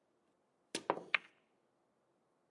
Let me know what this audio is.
The cue ball impacting with other billiard balls